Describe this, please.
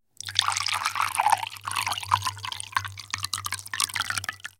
Pouring Water into a glass for making tea.
cup, fill, pour, flow, tea, filling, pouring, teacups, bath, liquid, water